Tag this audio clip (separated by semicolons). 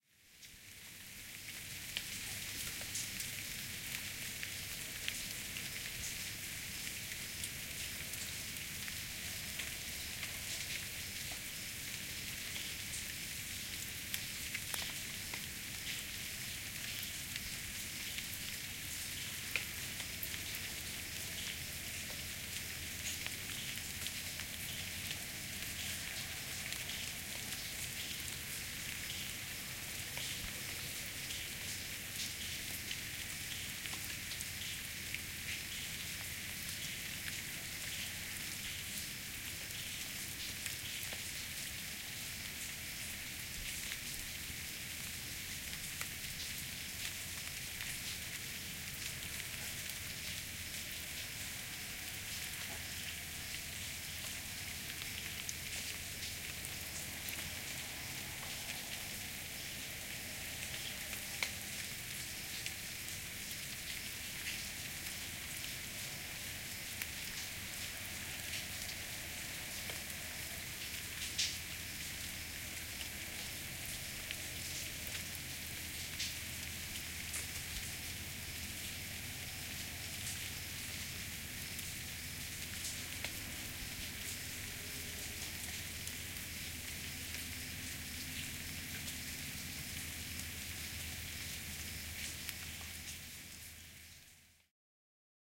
drip; dripping; drizzle; drops; rain; raining; rainy; water; wet